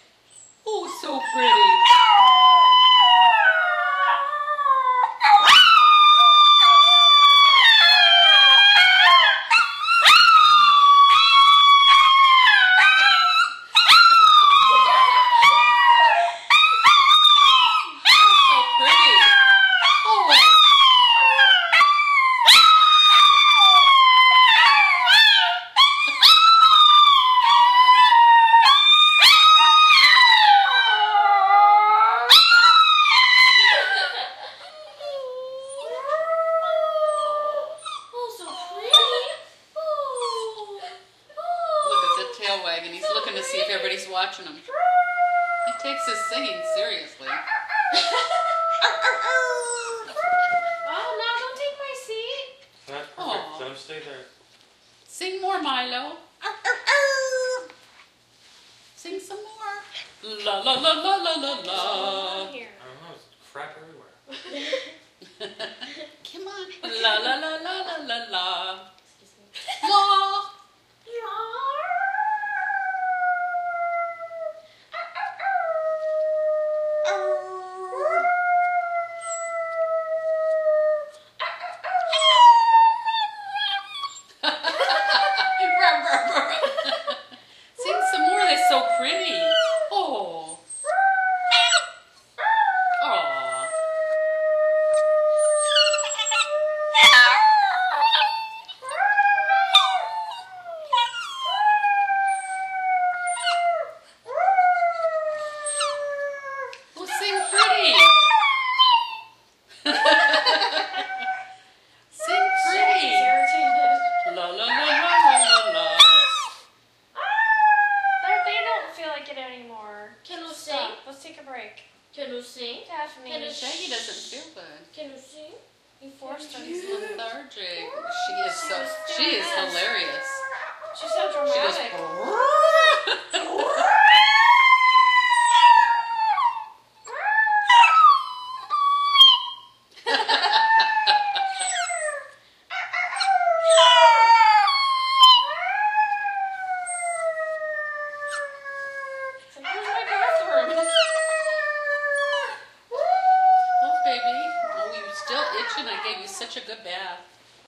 Two small but loud dogs tuning in to the ancient call of the wild from primordial doglore recorded with DS-40.
song, canine, howl, inside, singing, dog